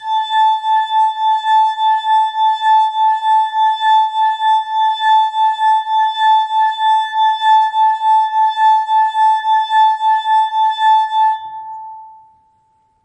Water Glass Pitch A5
A glass filled with water to pitch match an A5 on the piano
Pitch, Water-Glass